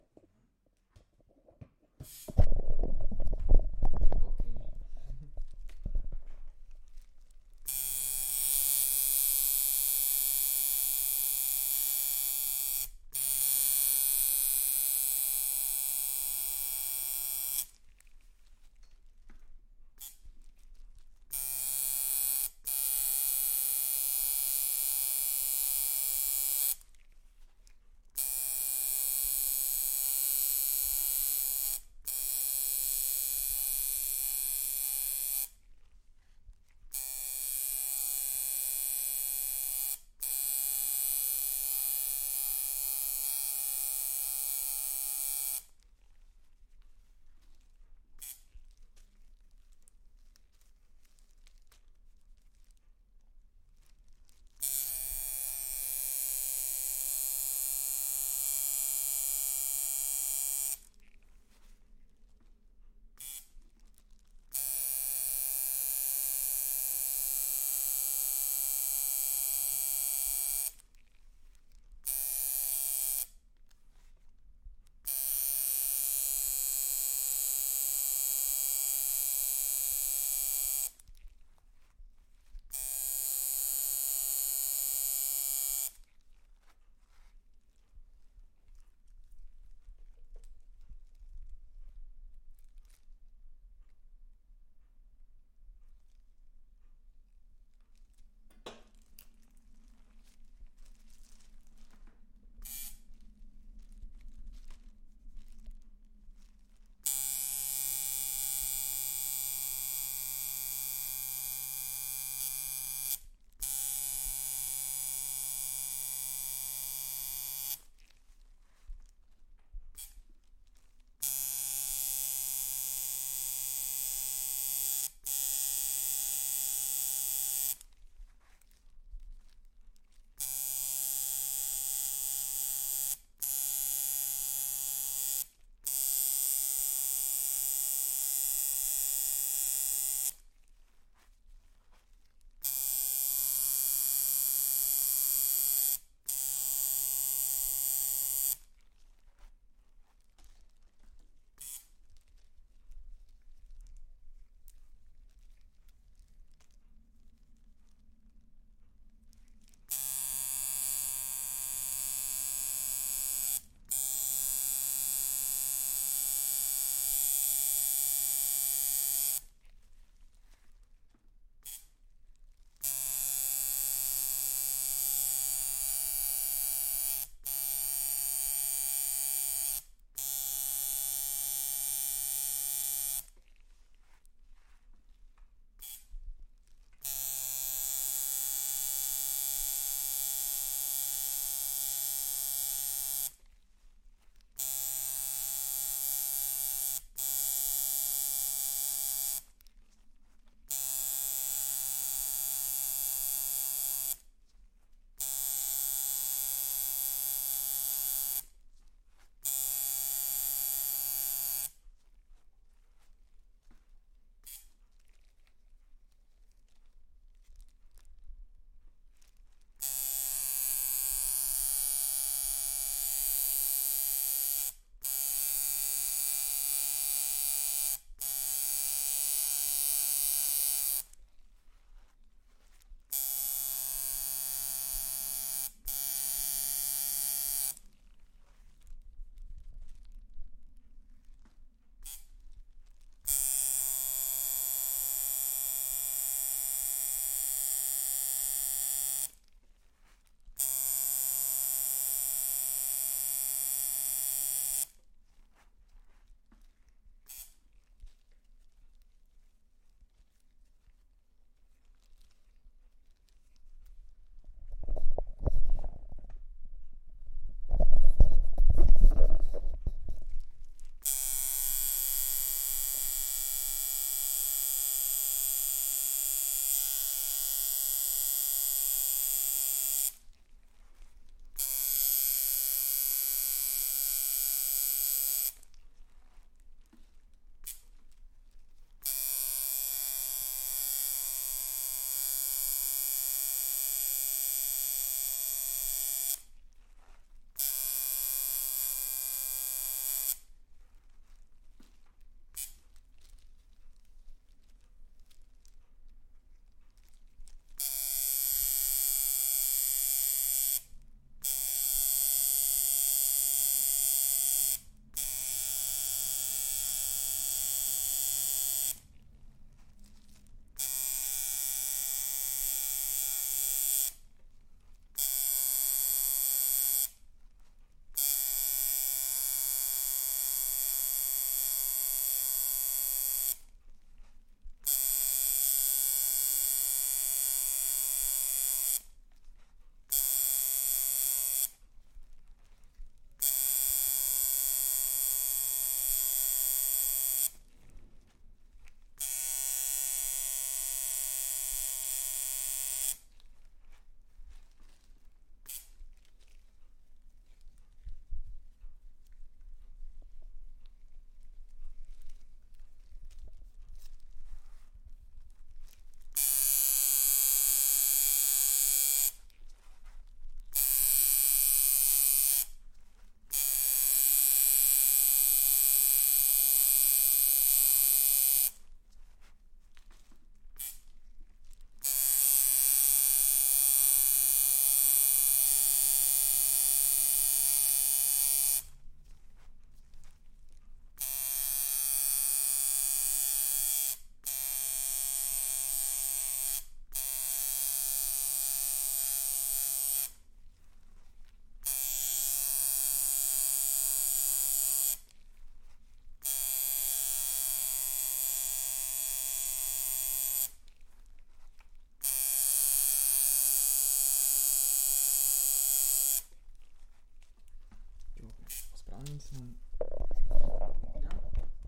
Tatto maschine recorded from a distance of 30 cm.
Used equipment: SD 633, MKH 416